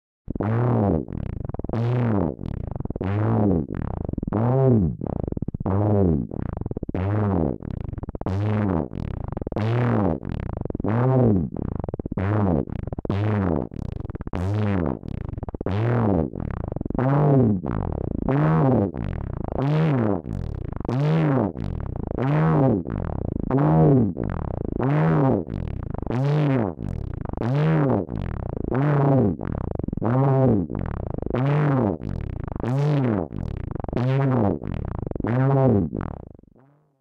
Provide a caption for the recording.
One in a series of long strange sounds and sequences while turning knobs and pushing buttons on a Synthi A.